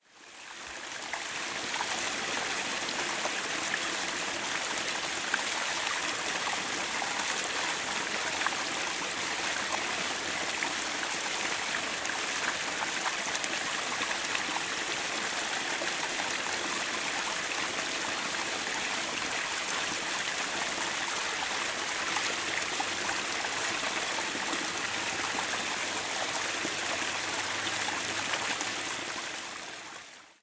Water Flowing Through Very Close Rapids 5
Very close field recording of water flowing through some rapids in a creek.
Recorded at Springbrook National Park, Queensland with the Zoom H6 Mid-side module.
creek, field-recording, liquid, close, flow, brook, trickle, river, stream, water, flowing, gurgle